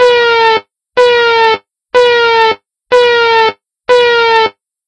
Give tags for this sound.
alarm; sci-fi; siren; warning

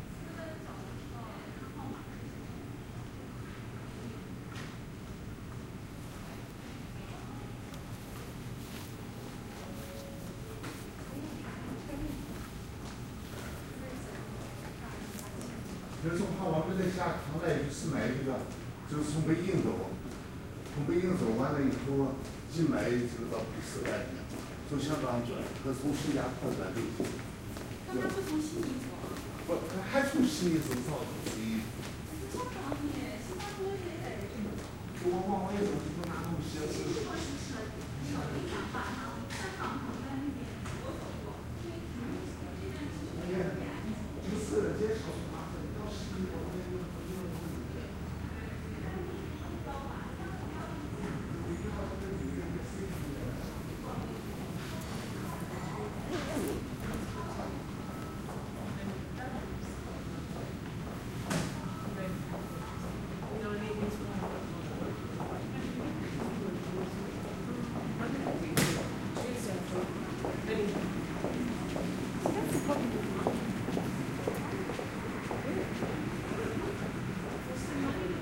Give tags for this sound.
airport; chinese; crowd; foot; foot-steps; people; steps; walking

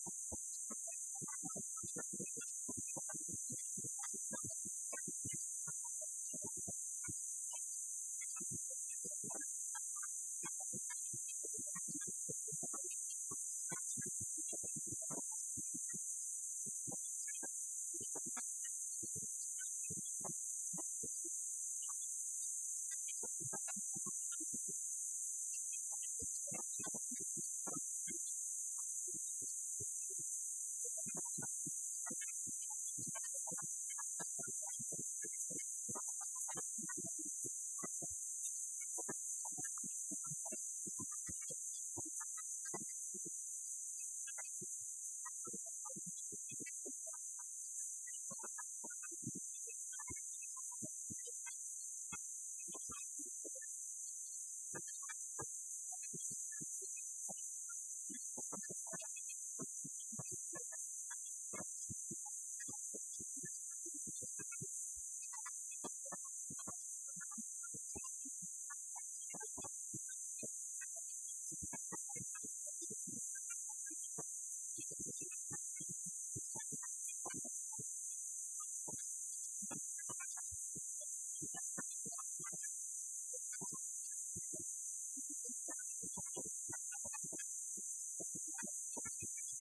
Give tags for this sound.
ambience; field-recording; industrial; machine; machinery; MOTOR

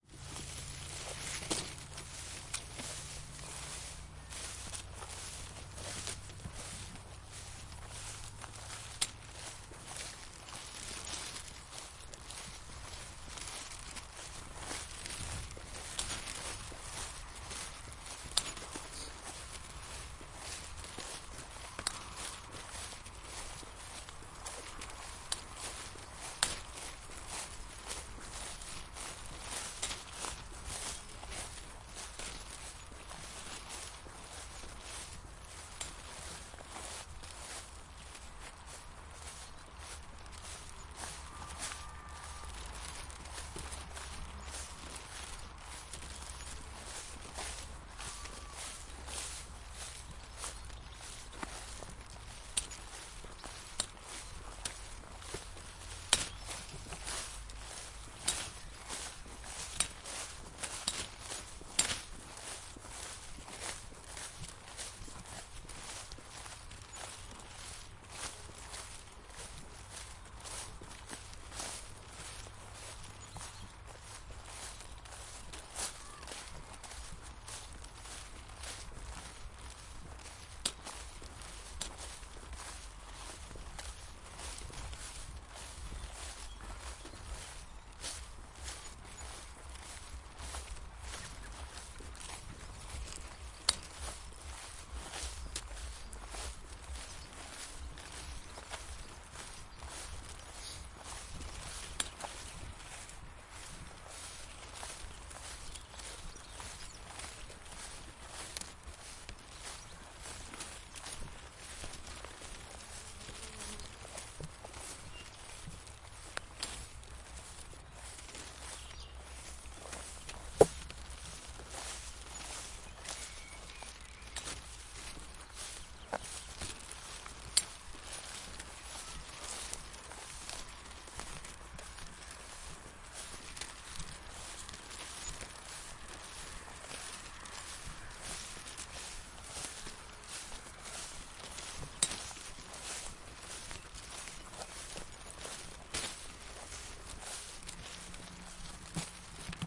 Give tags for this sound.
background; bag; birds; going; grass; hand; high; street; summer; through; traffic; walk; walking

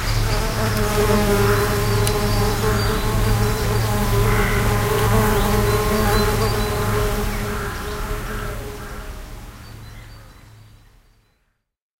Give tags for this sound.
Bee; Bees; Flower; Flowers; Insects

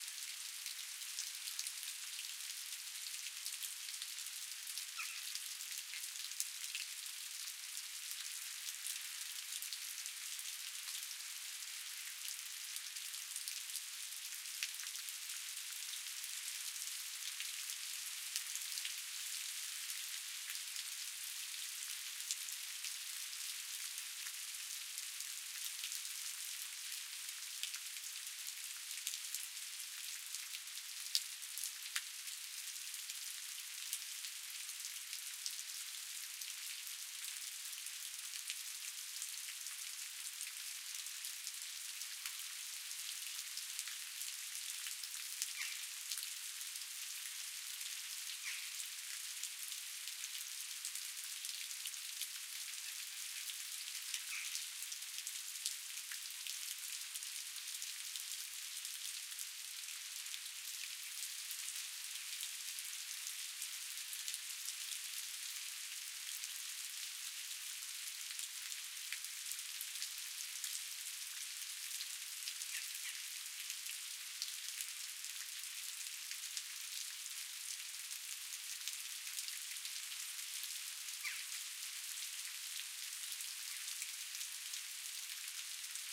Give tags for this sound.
thunder-storm; shower; field-recording; weather; outdoor; storm; raining; drip; thunderstorm; nature; thunder; rain

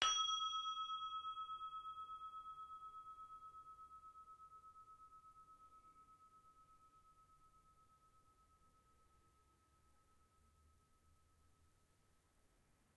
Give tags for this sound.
bell; campane; monastery; temple; tibet

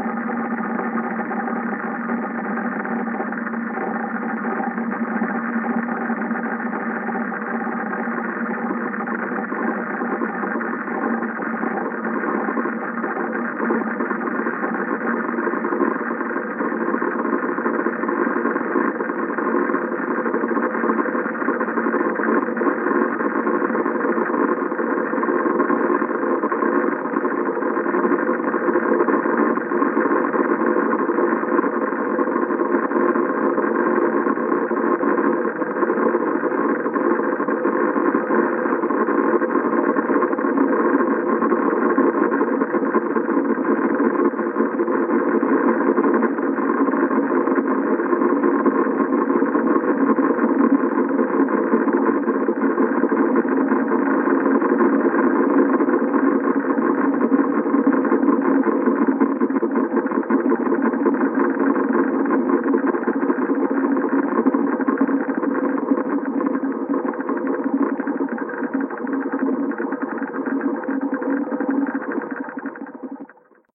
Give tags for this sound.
ambiance; electric-train; passenger-train; rail; railway; sleep-aid; train; trolley

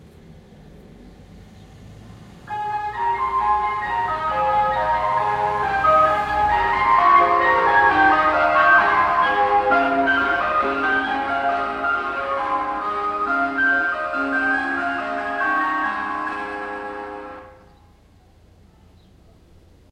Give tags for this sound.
day; location; music

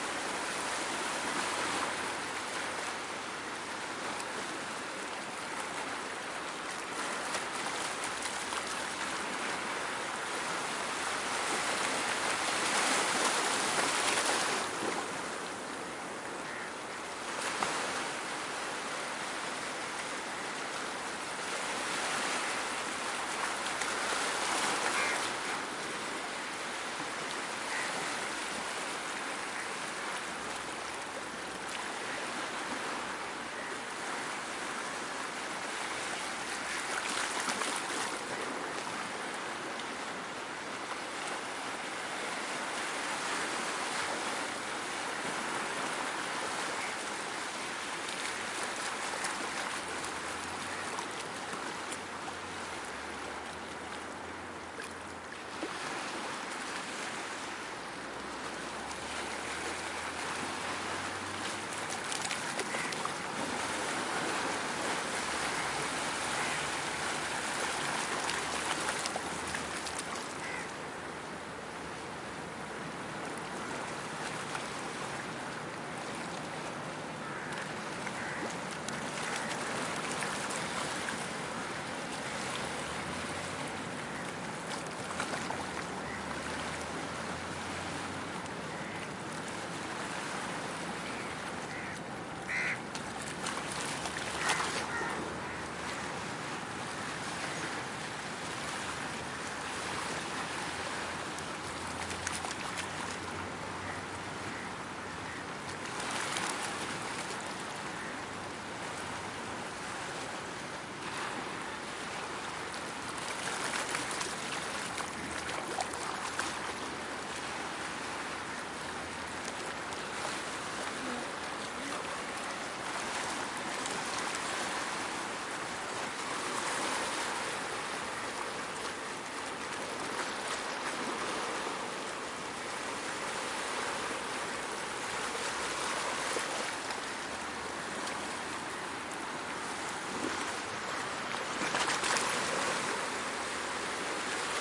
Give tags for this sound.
India; gentle; lake; medium; ocean; or; splashing; water; waves

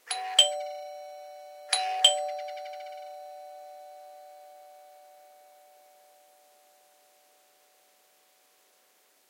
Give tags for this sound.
bell; door; doorbell

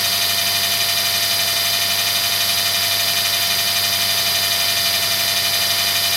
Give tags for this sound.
Bang; Boom; Crash; Friction; Hit; Impact; Metal; Plastic; Smash; Steel; Tool; Tools

